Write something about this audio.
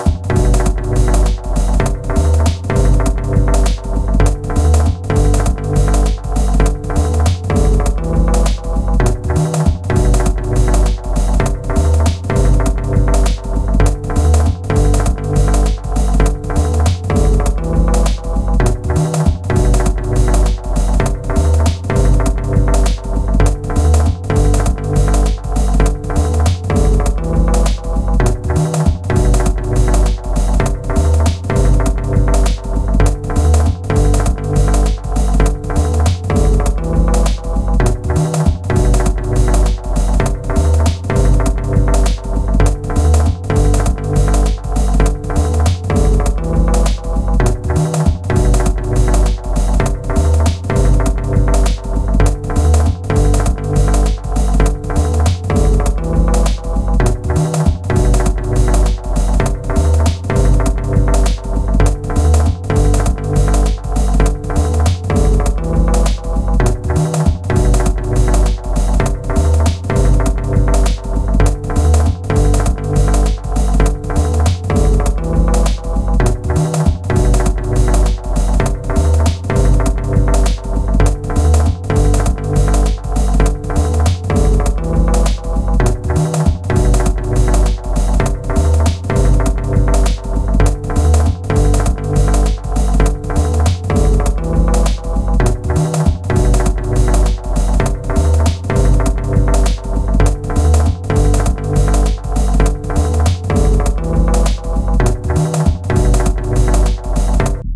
chill music
music, percussion-loop, song, ambient, improvised, chill, loop